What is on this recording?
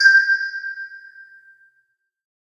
bbsphit08HP*waterdrop02#1
Large metal bowl struck with a spatula, highpass filtered and convolved with a waterdrop
hit, metal-bowl, waterdrop